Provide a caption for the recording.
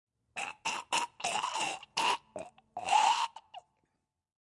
Zombie Choking
Zombie, Scream, Choking, Breath, Attack